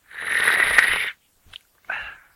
Guy Drinks a cup of coffee. Sweeps the liquid of the cup.